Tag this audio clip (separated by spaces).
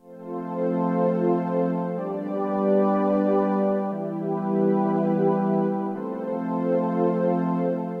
strings synth electronica